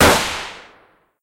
Rifle Gun Shot 01
A S/O to InspectorJ for being the only active Moderator at the moment - and for moderating this sound super fast!
Rifle gun shot!
Appreciate the weapon wholesaler company Sako Sweden for letting me use the Tikka T3 Tactical picture!
If you enjoyed the sound, please STAR, COMMENT, SPREAD THE WORD!🗣 It really helps!
attack, fire, firing, game, gun, hunt, rifle, shoot, shot, sniper, sniper-rifle, target, trenches, warfare, weapon